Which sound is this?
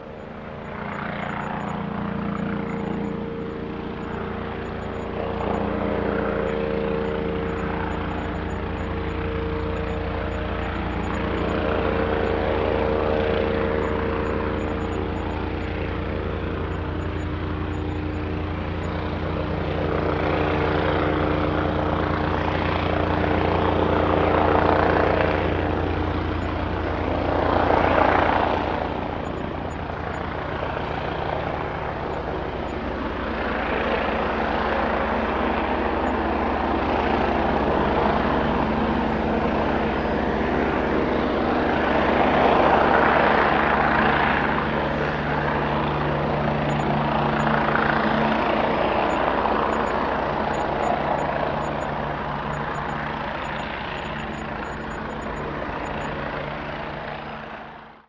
police helicopter overhead. There were gusts of wind so the sound fluctuates. Sennheiser k6me66+akg ck94 into shure fp24 and edirol r09, decoded to mid-side stereo
city, engine, field-recording, helicopter, machine, police